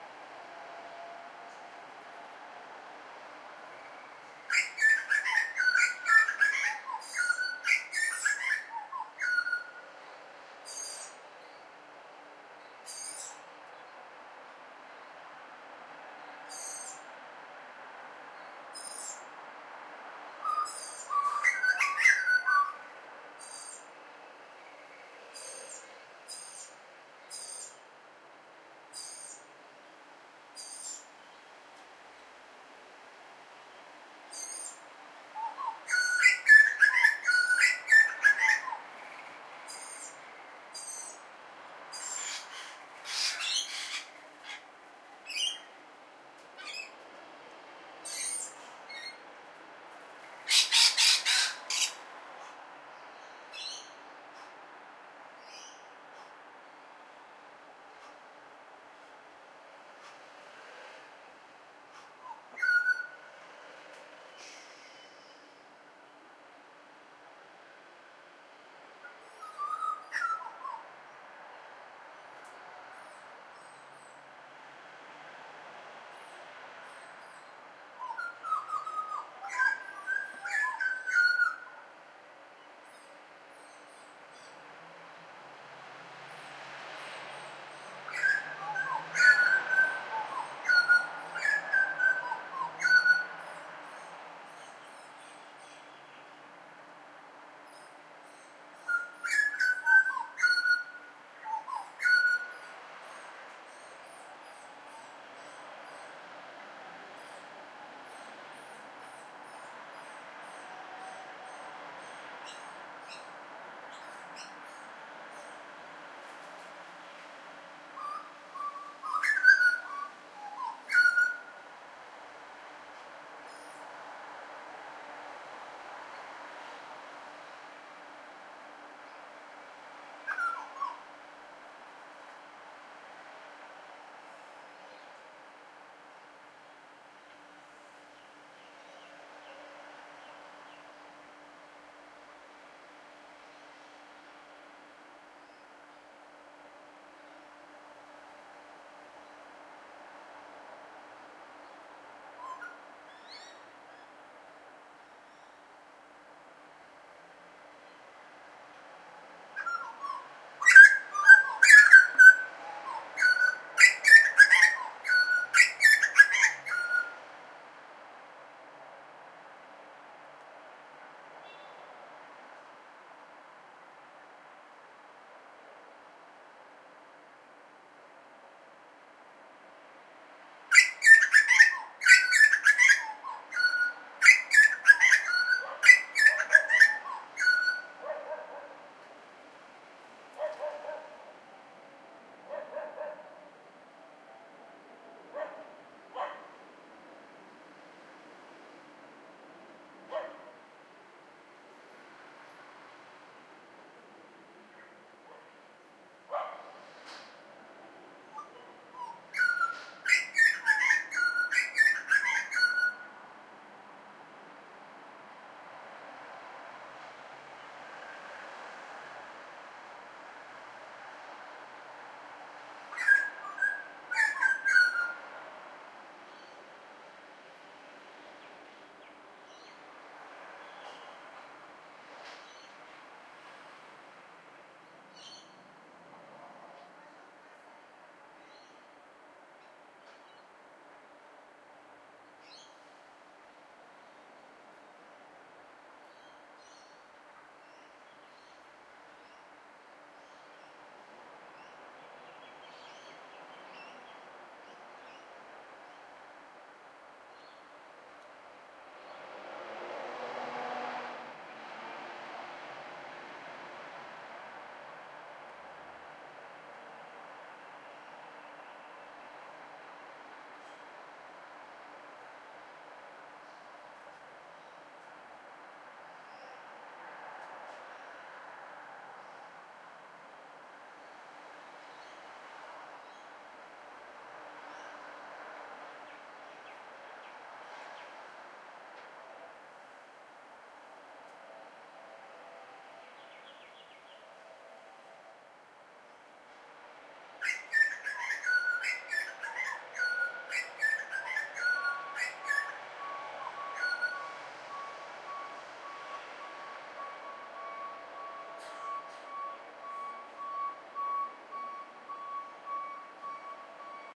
Butcher Bird In Suburbia
bird, field-recording, Australia